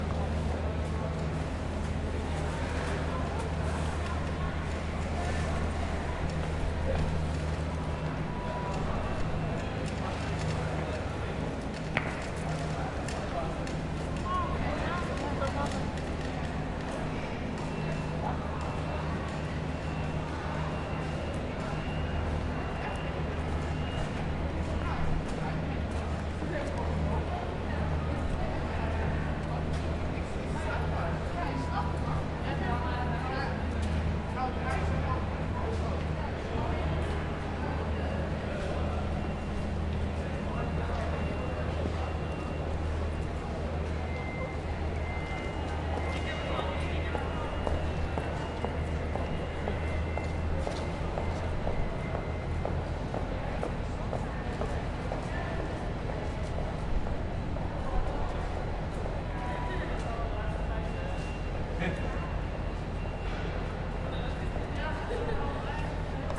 Station/city ambience
Recording of a busy train station with construction work going on in the background. Recorded with a Zoom H4N.